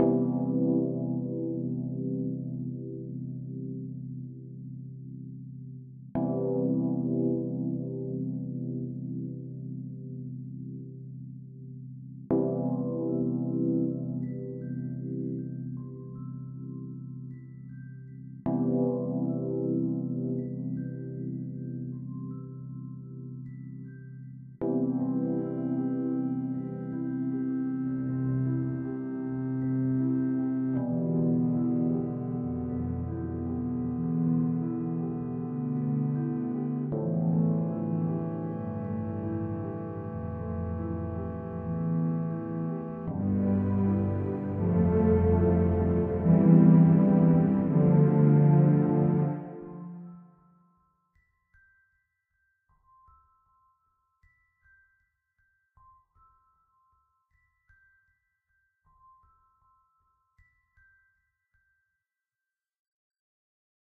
Loops -- Stargaze Movement
Loops I used for my short podcast series "Spoken Through." Made in ProTools.
quantized, loops, rhythmic